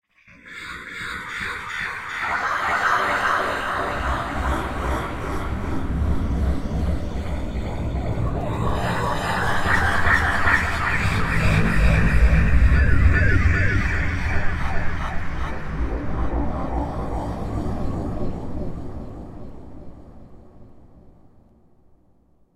In my head
The original audio was recorded from an AM radio and then processed in MetaSynth.
abstract, digital, effect, fx, MetaSynth, sci-fi, sfx, sounddesign, soundeffect, strange